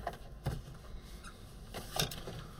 Hand Squeezing on Wooden Surface
Hand squeaks as it slides across a wooden surface.
Squeak, wood